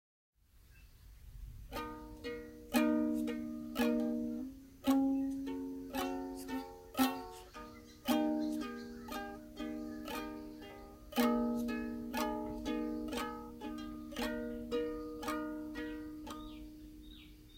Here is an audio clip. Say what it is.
Ukulele strums
A ukulele being quietly strummed with a capo on the second fret.
chord, note, string, uke, ukulele